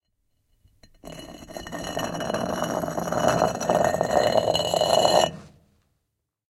stone on stone dragging3
stone dragging on stone
stone, concrete, grinding